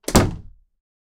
pine door shut 2 - slam
Pine door being slammed shut - recorded with internal mic's of a Zoom H2
door, shut, foley